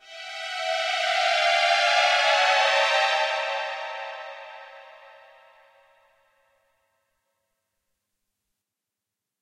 hi string fx
Strings from Korg 01/W. Set to 172bpm.
strings, 16, bit